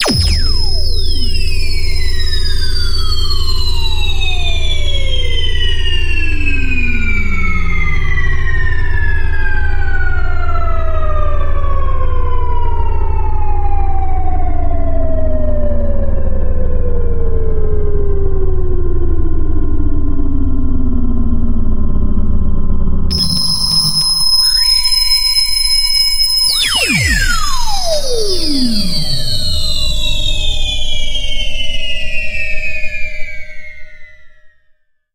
Fx Glitch 2
fx, glitch